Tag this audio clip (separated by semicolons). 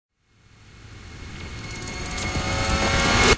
impact; cymbal; hit; noise; sfx; white